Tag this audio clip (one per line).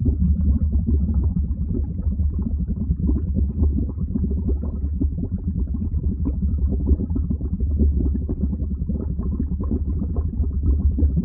Air
Boil
Boiling
Bubble
Bubbles
Hot
Lava
Water